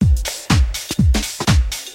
A simple house beat.